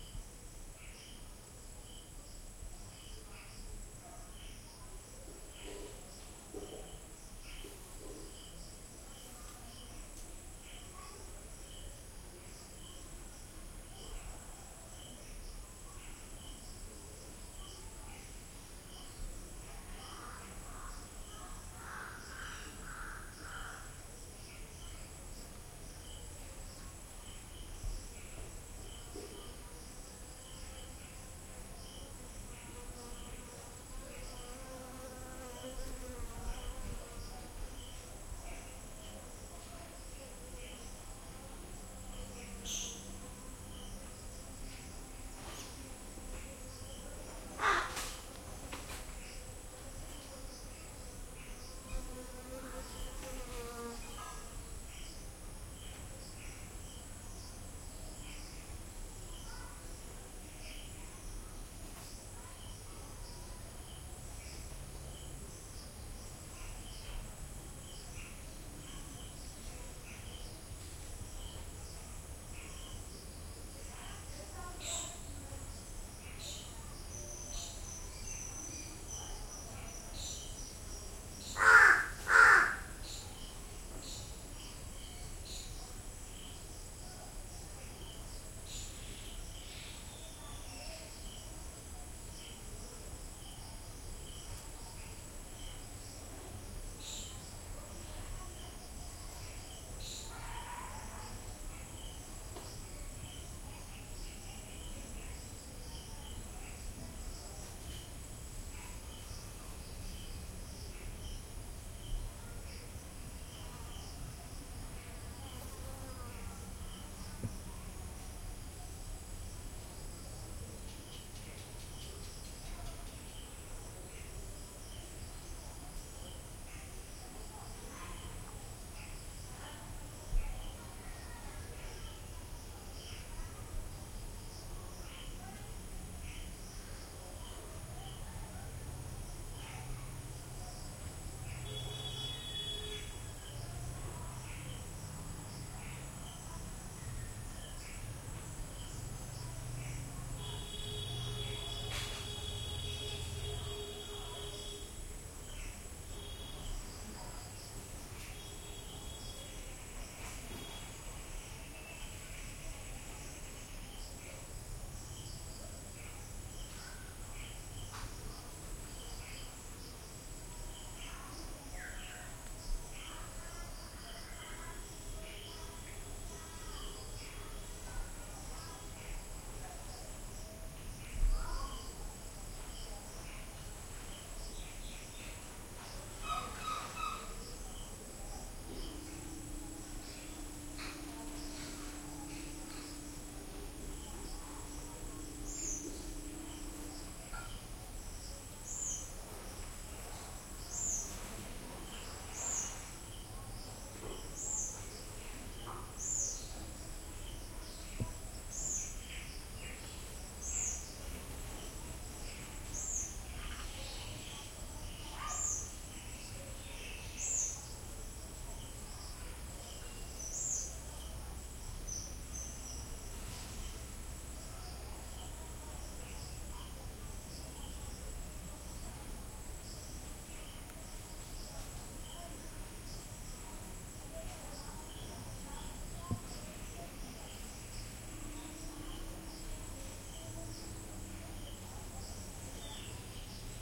courtyard day quiet crickets distant voices and traffic enclosed slight echo +crow and fly India
distant, traffic, courtyard, day, quiet